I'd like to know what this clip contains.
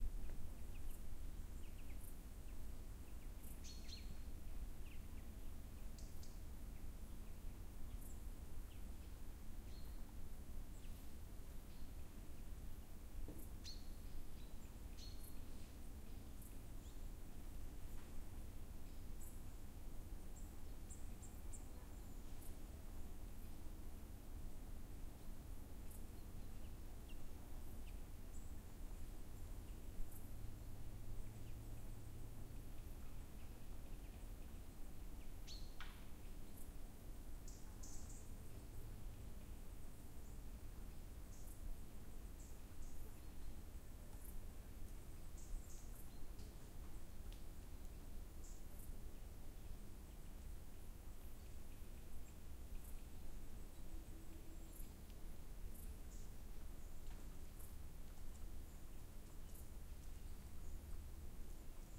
atmosfera ptaci cvrcci2
ambiance, birds, field-recording, nature